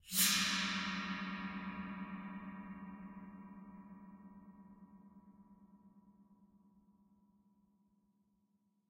A stinger that plays when there is something suspicious
Suspense, Stinger, Suspicious